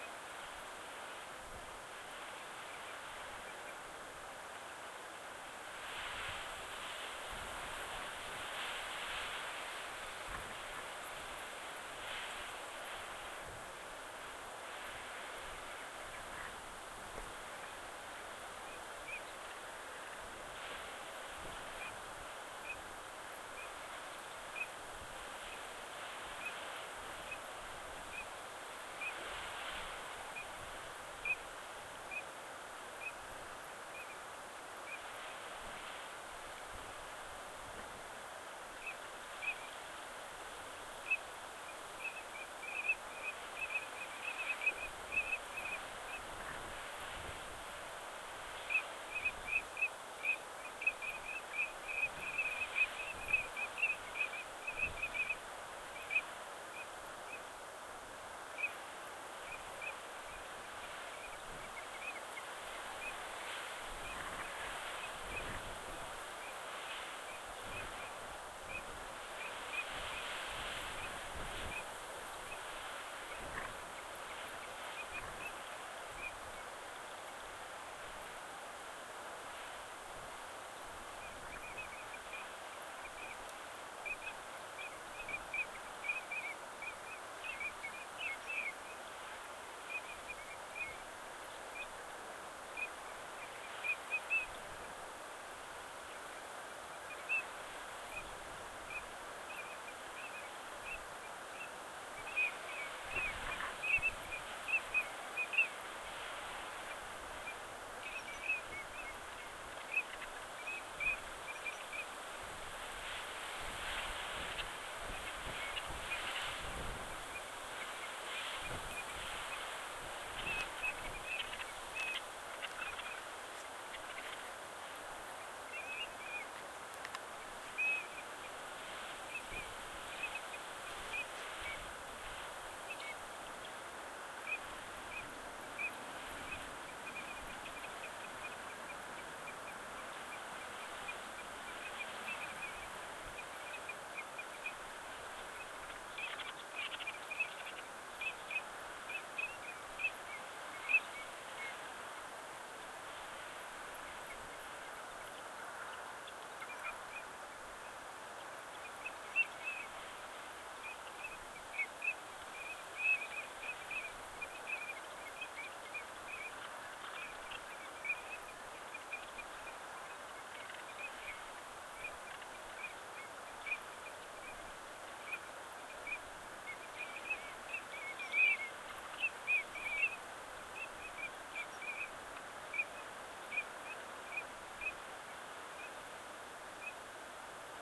Marsh ambience teal on a windy day viv35 pb
Distant Teal recorded on the Solway firth on a very very windy day. Mic. Vivanco em35c parabolic dish.
Anas-Crecca, ambience, field-recording, salt-marsh, teal, water, wind